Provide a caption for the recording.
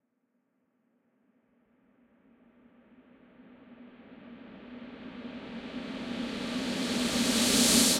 snr whoosh 01 16bitmono
A buildup Whoosh!
fx, effect, buildup, sfx, whoosh